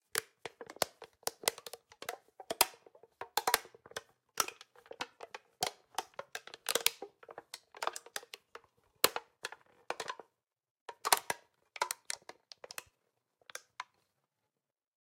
delphis CHERYY COKE BOTTLE PUSHING 1
Selfmade record sounds @ Home and edit with WaveLab6
crunch, pushing, cherry, fx, coke, bottle, crisp, finger